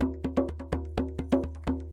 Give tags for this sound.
drum; loop; djembe

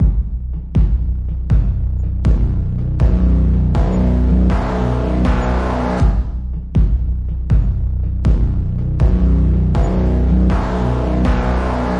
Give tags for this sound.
Loop; Drum; Movie; Cinematic; Drums; Sequence; Film; Pulse; Percussive; Percussion; Hollywood; FX

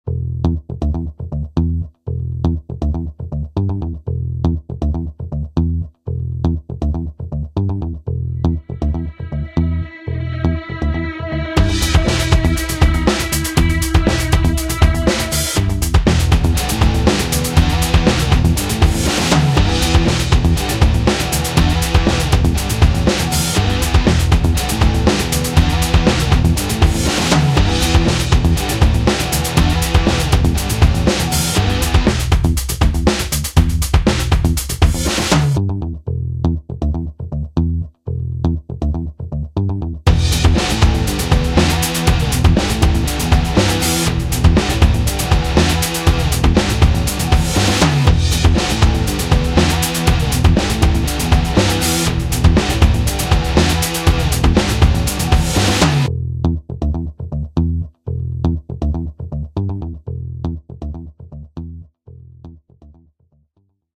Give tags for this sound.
Beat; Music; Podcast

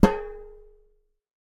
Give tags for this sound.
hit; lid; metal; thump

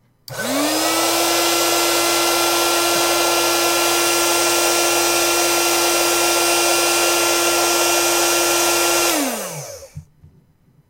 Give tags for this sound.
air; airbed; bed; inflate; nature; outdoors; pump; tent

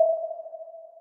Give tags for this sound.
echo; click; bleep; sonar

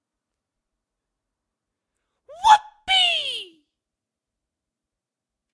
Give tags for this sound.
yippie; excited; woho

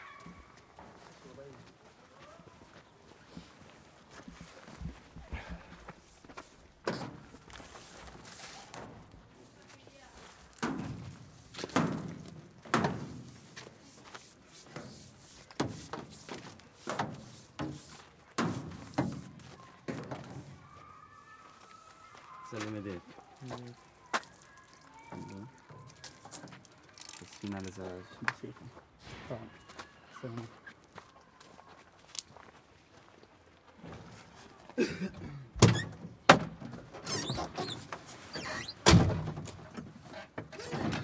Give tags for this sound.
close; door; hollow; hose; metal; open; pump; thuds; truck; water; wrap

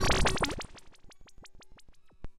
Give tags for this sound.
analog; glitch; laser; noise; sfx; synth

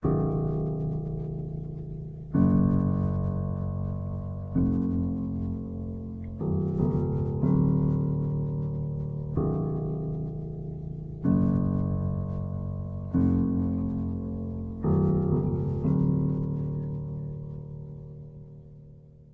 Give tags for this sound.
bells; horror; jingle; music; piano; tune

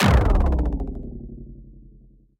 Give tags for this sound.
Failure; Freq-sweep

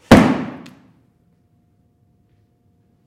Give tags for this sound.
box; cardboard; crash; foley; soundeffect; thud

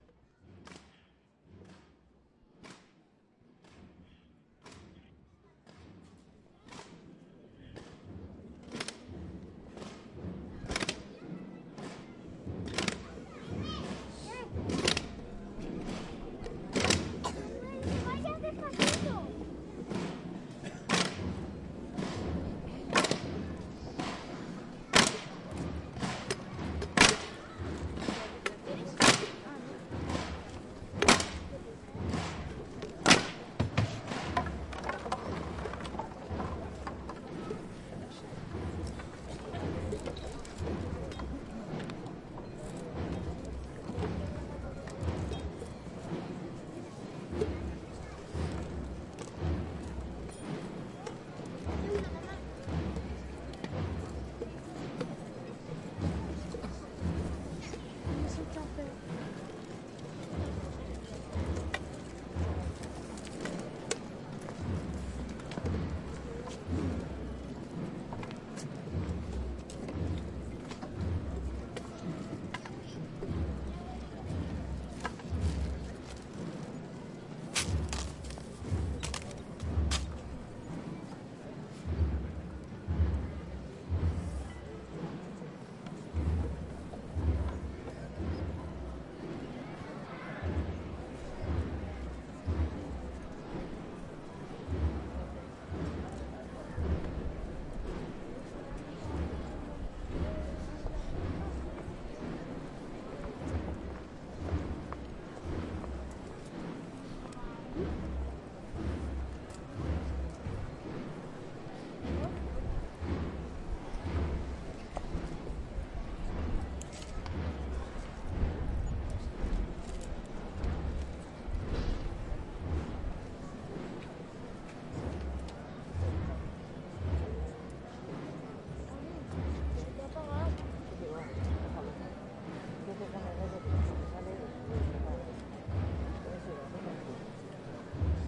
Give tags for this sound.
Cuenca; Procesion; marching; brass

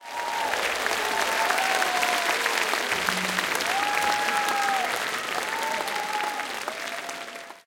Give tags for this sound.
group; applauding; applaud; theatre; auditorium; Holophone; applause; hand-clapping; audience

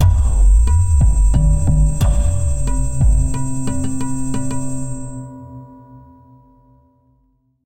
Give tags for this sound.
ambient; glitch; idm; irene; irried; jeffrey; spaces

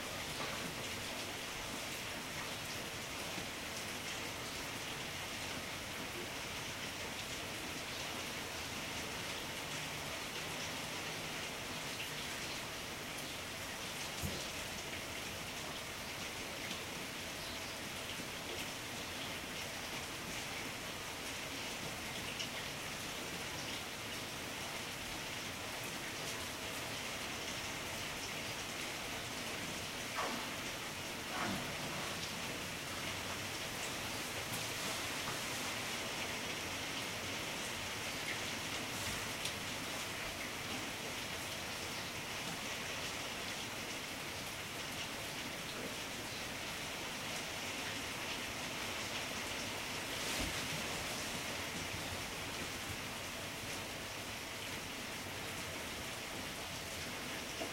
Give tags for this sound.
indoors-rain-sound; household; water; inside-rain; ambient; ambiance; inside-rain-sound; atmosphere; rain; general-noise; nature; background-sound; australian-rain; Australia; ambience; field-recording; indoors-rain

